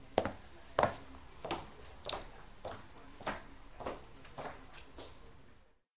A middle-aged man walkin´downstairs over wooden steps. Phone was placed on the edge of the first step. No effects used.